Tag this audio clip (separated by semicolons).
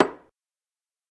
drums; percussion; percussive; tuba